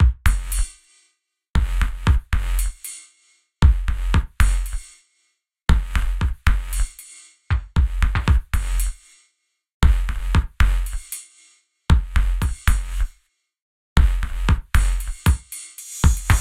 reverb short house beat 116bpm with-07
reverb short house beat 116bpm
dance, techno, electro, 116bpm, electronic, house, rave, club, trance, loop, beat